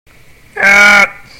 Legendary Scream
My friend has some very crazy screams on his throat! :D
Use it for something!
legendary, does, what, scream, my, monster, heck, horror, scary, a, friend